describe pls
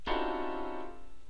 Piano Slam
This is me slamming my hands on my piano. What for?
ouch, piano, slam, deafening, boom, smash, bang, pain, sound